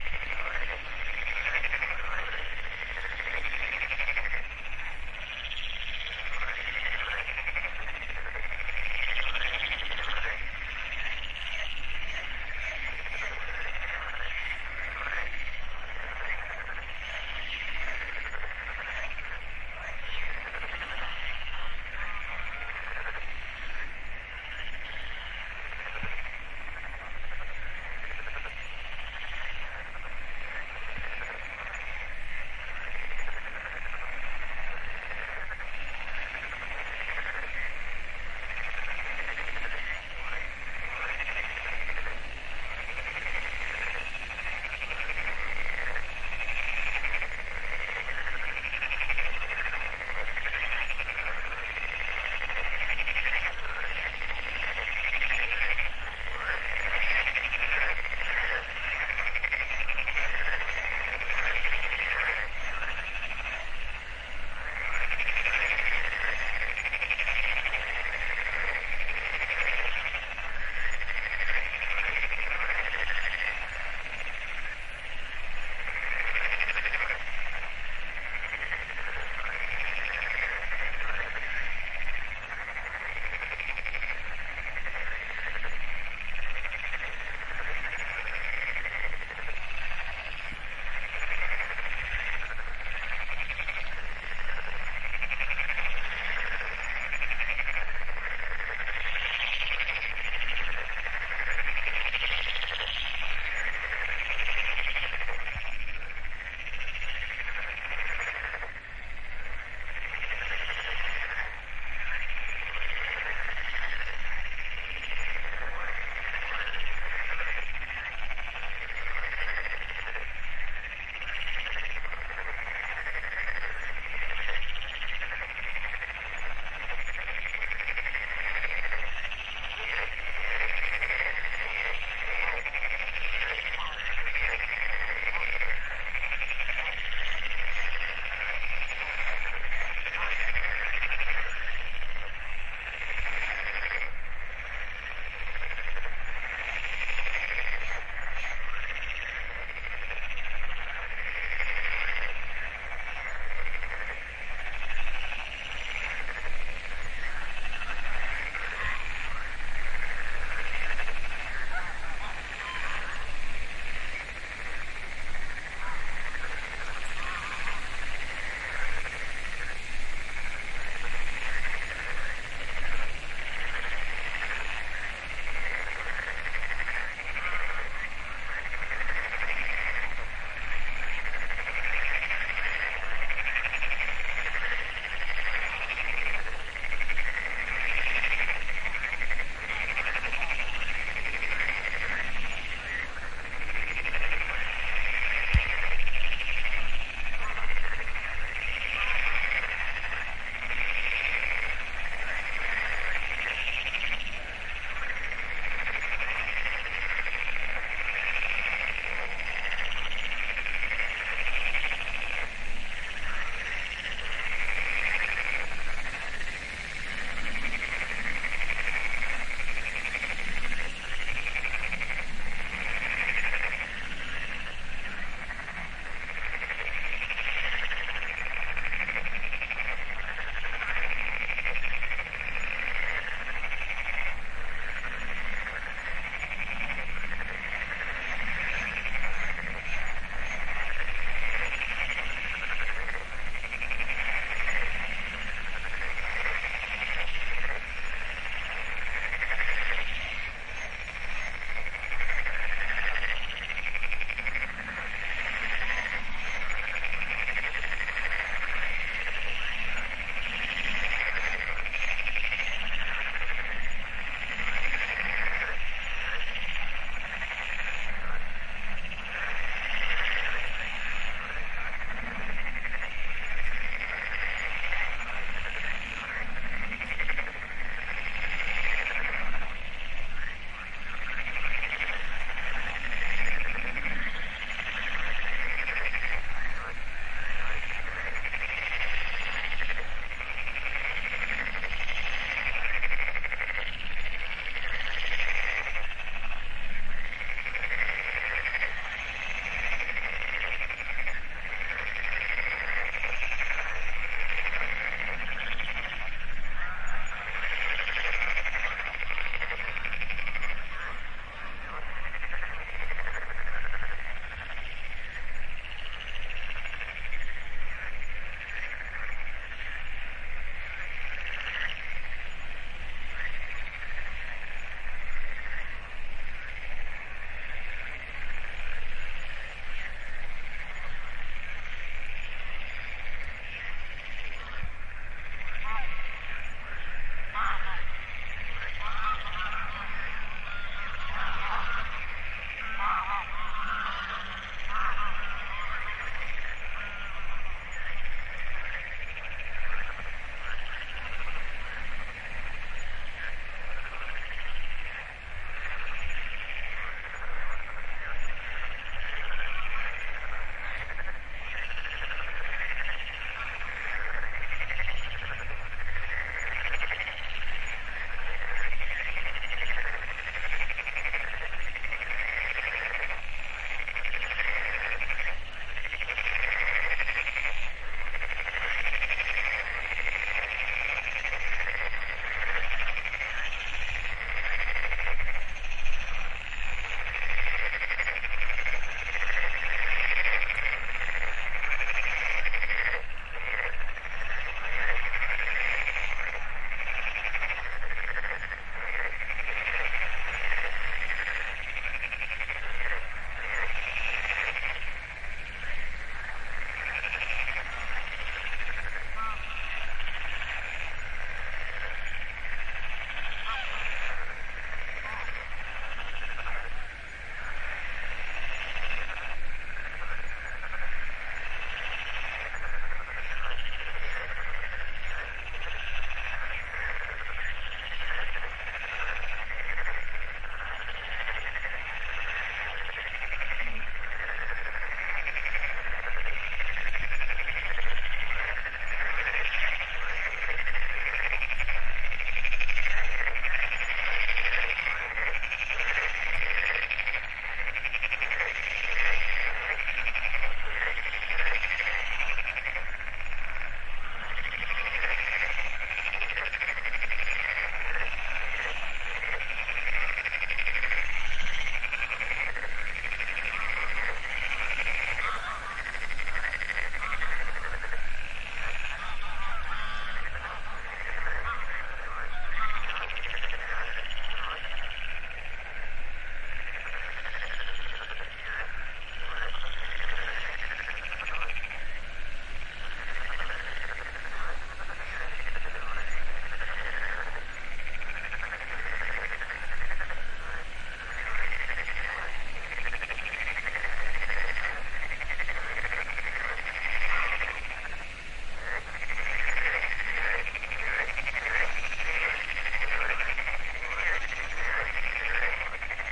Frogs and geese in a swamp
Listen to frogs and geese in the middle of the night in a swamp during my holiday in Limburg (Netherlands). Recorded with the Zoom H5 recorder + Audio-Technica BP4025 Microfoon in a RØDE Blimp Windshield and Shock Mount System.
ambiance, ambience, bird, birds, BP4025, field-recording, forest, frogs, geese, insects, marsh, marshes, nature, pond, spring, swamp, toads, wetlands, wildlife